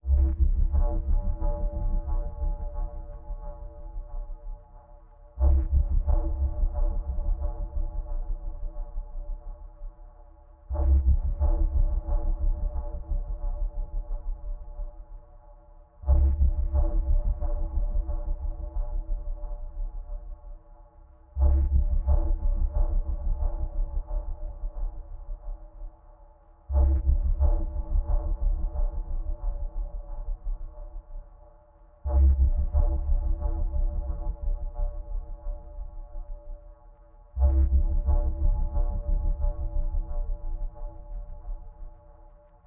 90 bpm C Key Low Bass
90, beat, bpm, C, delay, distortion, electronic, experimental, hard, key, loop, rhythmic, techno